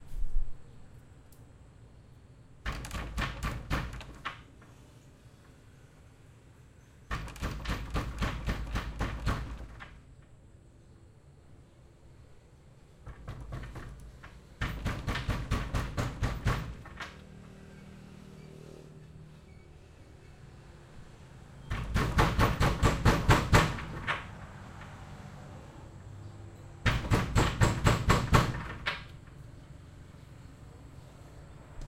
Banging on wooden door
Recording of someone banging on an old wooden door. The door has some shackles and chains hanging off that can be also heard
bang, chain, door, gate, knock, wood, wooden